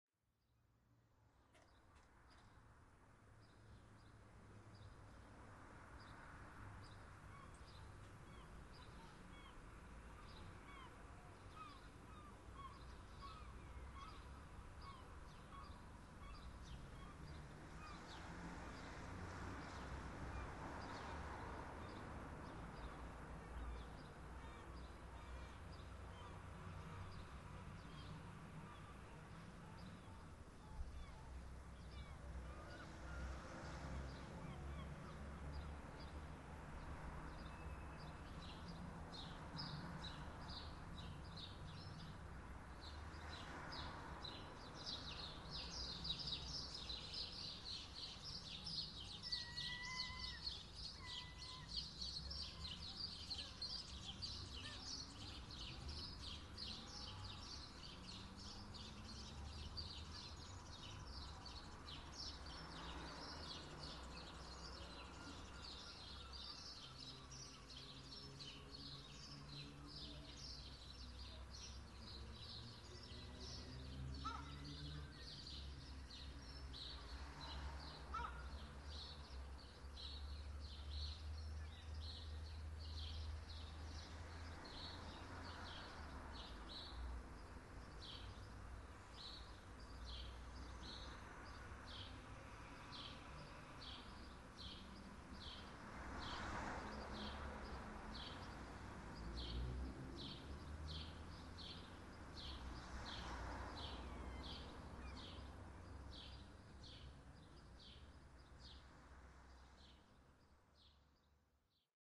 seaside sunday
Sunday in Hastings with gulls and traffic